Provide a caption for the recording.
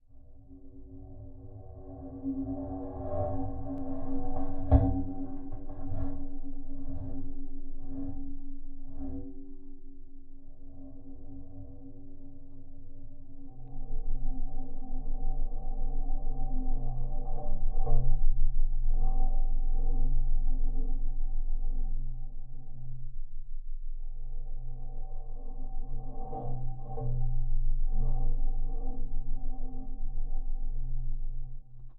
FX SaSc Low Metallic Movement Impacts Hits Metal Cable Car Geofon
Low Metallic Movement Impacts Hits Metal Cable Car Geofon